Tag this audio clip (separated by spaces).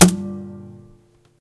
ding ting